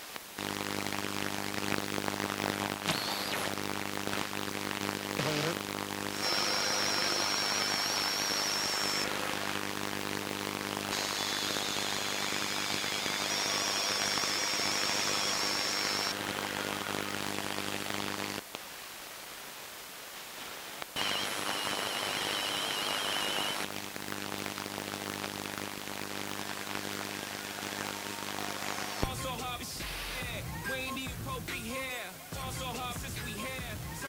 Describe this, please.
Interesting noises in the shortwave 21m band, around 14kHz.
Sounds like digital communications of some sort.
If you have a shortwave receiver, have a look and see if you can hear them.
communication, interesting, noise, radio, short-wave, strange, telecom, transmission, unidentified